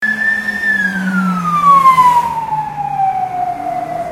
a sound of a police car going past
funny, effect, game, sound, sfx